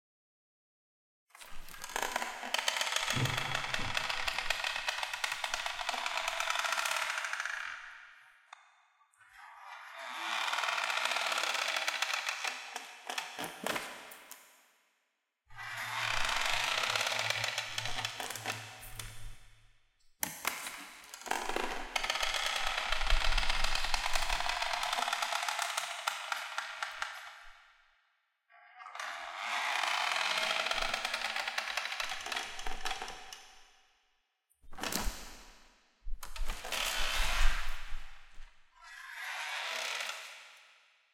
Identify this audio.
Creaky Door - Processed
The sound of a creaky door, recorded with my Zoom H5.
Processing: noise-removal (ReaFir), mild compression/coloration (Molot VST), and convolution reverb (Reverberate Core; factory preset: Medium Plate TS).